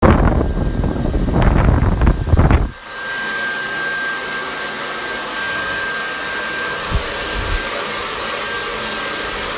Just a random sound of a blow dryer.